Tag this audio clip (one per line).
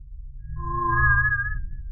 alien
graphic-synthesis
metasynth
short
synthesized